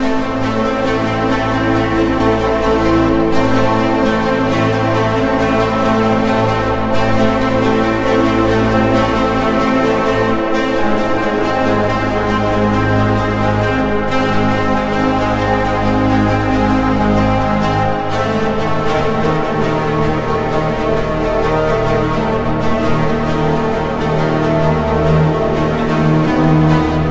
the last man in space music by kris
did it on keyboard on ableton live
film, movie, sad, sad-horror